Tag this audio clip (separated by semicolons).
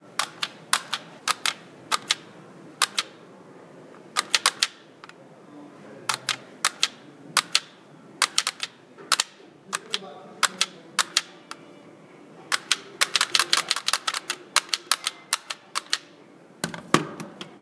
arcade; buttons; game-sound; joystick